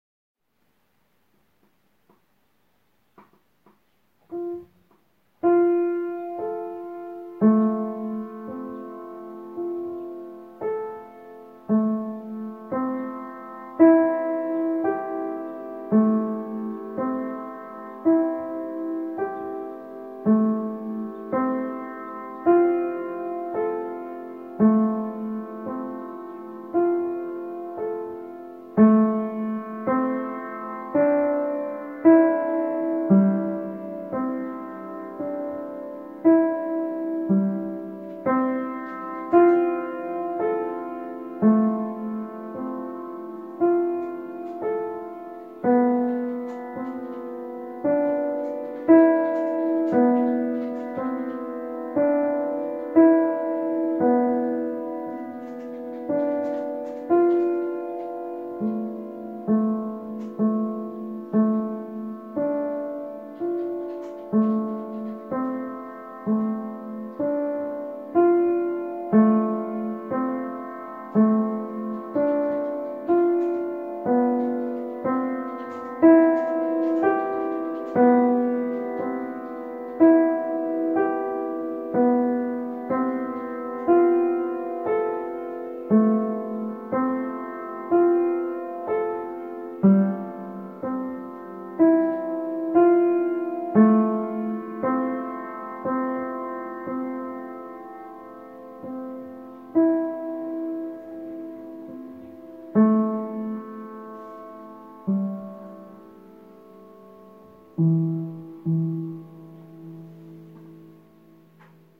Playing a Pleyel piano, with some background noise